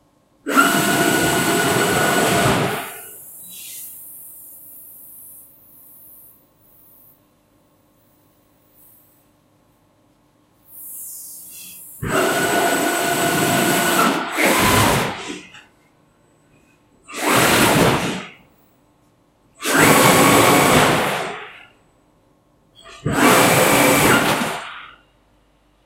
Laser Machine Diagnostic
Buzz, electric, engine, Factory, high, Industrial, low, machine, Machinery, Mechanical, medium, motor, Rev